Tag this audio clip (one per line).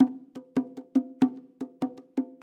bongo
drum
loop